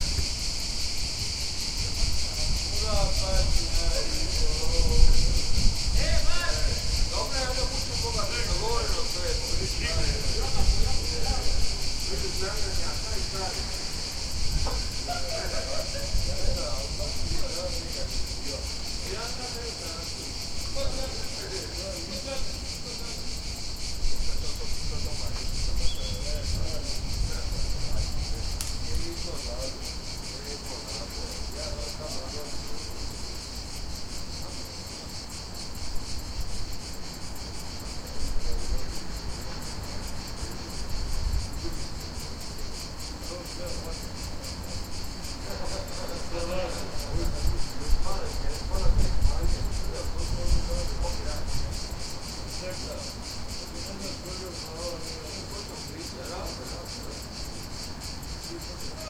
Crickets+chatter Split
Crickets, a couple of men chattering in croatian in the background. Recorded from a balcony at around 11 pm in june, 2018 - in Split, Croatia
nature, night, summer, crickets, insects